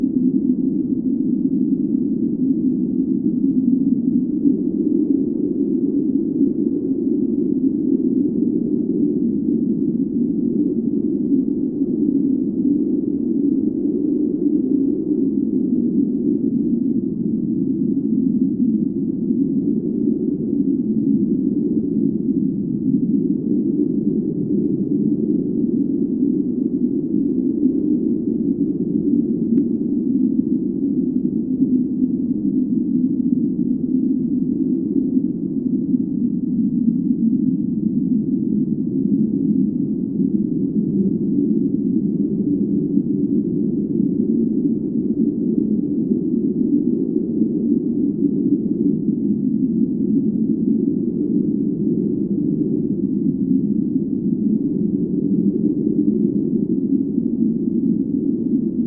Random moaning low wind sound created using MAX/MSP.
wind, lowpass, msp